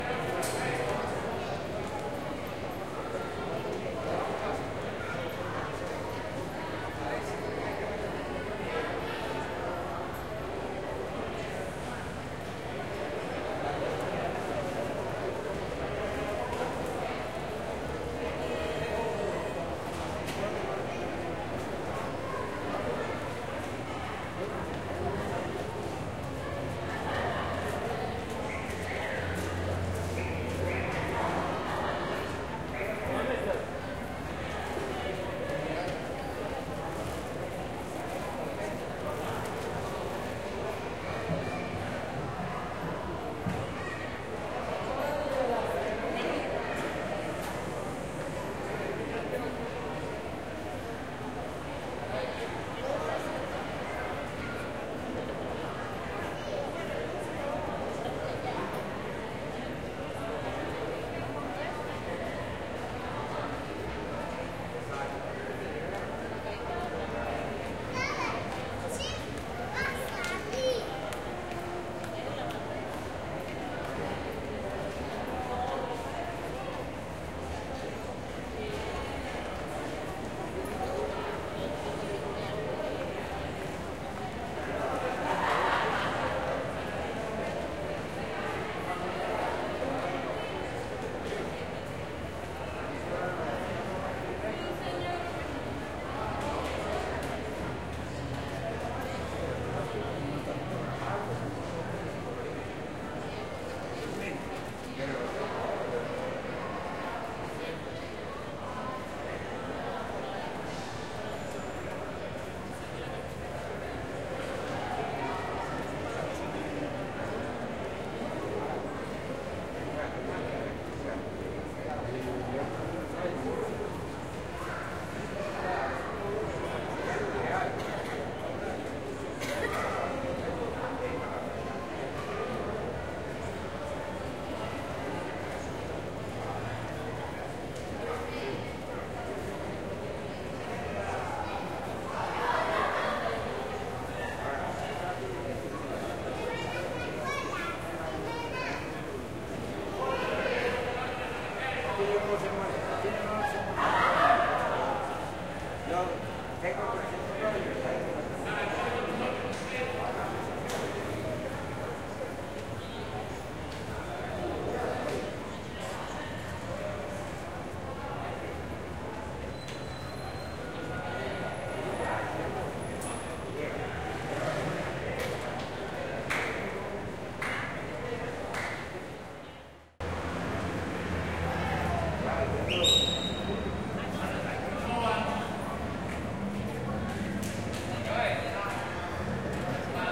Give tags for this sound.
Ambience; Station; Bus; Wide; People

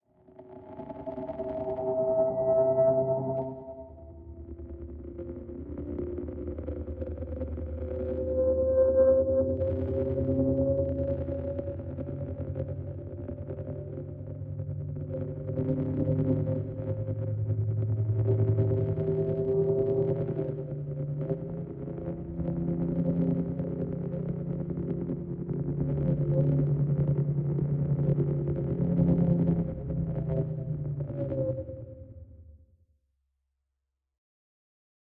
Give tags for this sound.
sci-fi; drone; granular

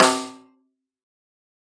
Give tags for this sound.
1-shot; drum; snare; multisample; velocity

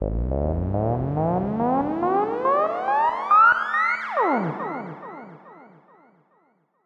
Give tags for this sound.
sci-fi; abstract

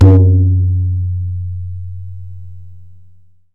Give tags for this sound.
strokes; bol; hindustani; drum; tabla

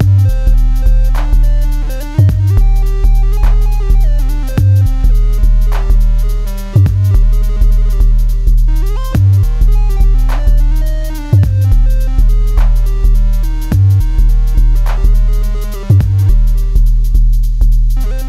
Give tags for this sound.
chillout; minor; 105bpm; figure; random; chill; soft; C; ethnic; triphop

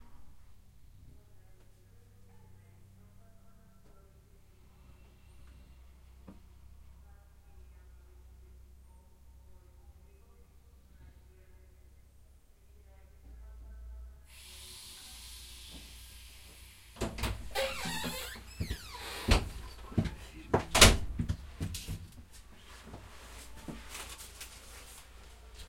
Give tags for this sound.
clank; conductor; dishes; night; noise; passenger; passenger-wagon; railway; rumble; station; train; travel; trip; wagon

